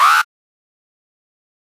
1 short alarm blast. Model 3